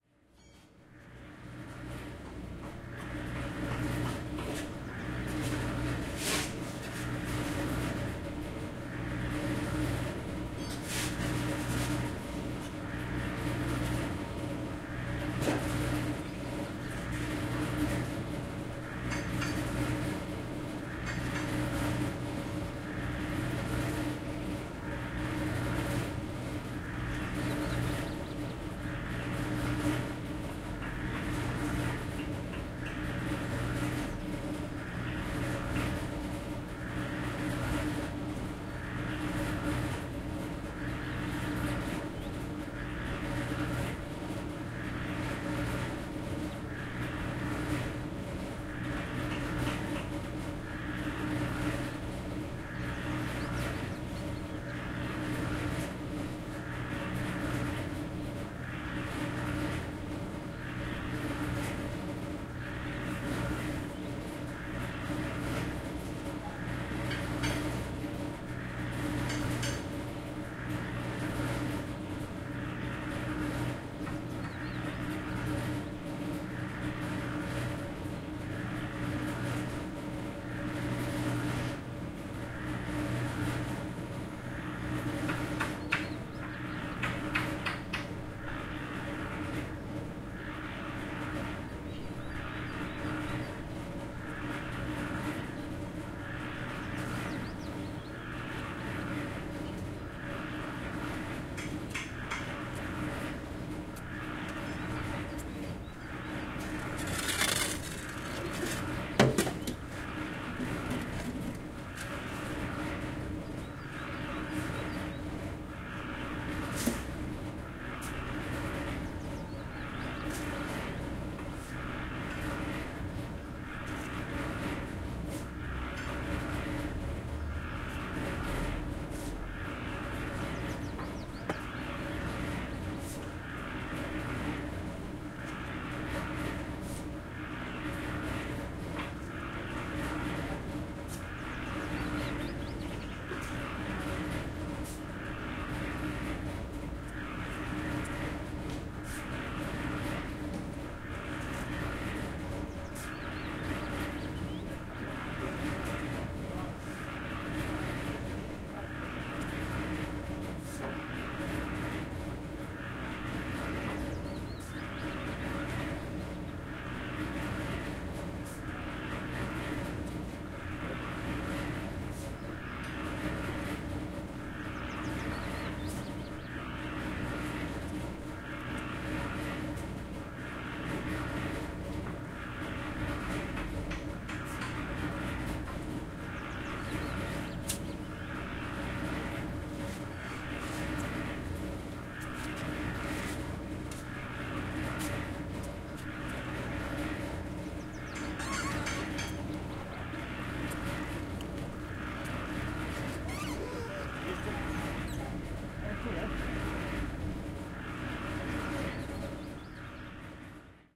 concrete mixer 080513
08.05.2013: about 12.00. Ostrow Tusski, Posadzego street in Poznan (POland). Sound of concrete mixer.
zoom h4n, internal mic
poznan, noise, concrete-mixer, workers, rythm, poland